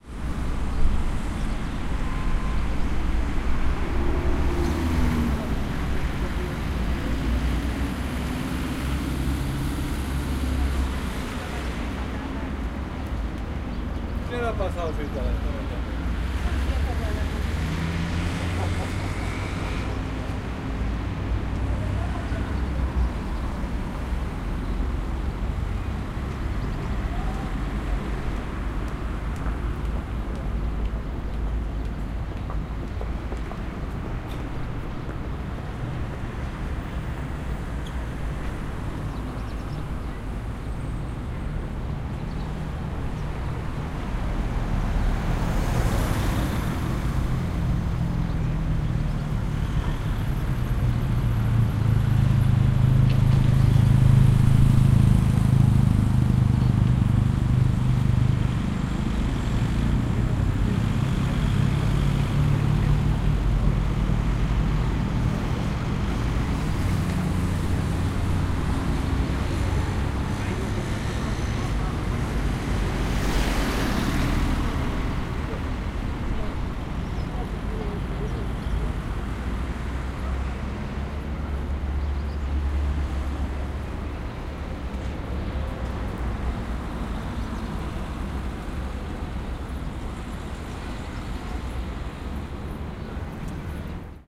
Traffic and people talking in Spanish and walking.
20120324